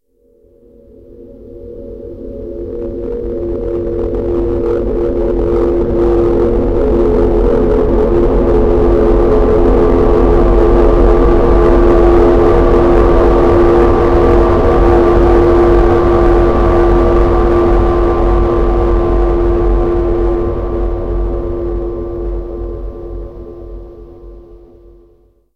distroy F3edback
Extremely loud distorted feedback.
feedback, noise, amp, distortion